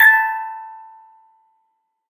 metal cracktoy crank-toy toy childs-toy musicbox
childs-toy, metal, crank-toy, musicbox, toy, cracktoy